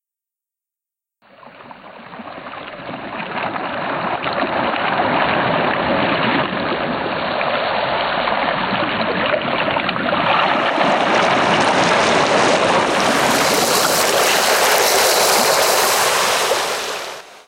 synthetic wave cycle.
waves, shore, beach, synthetic, water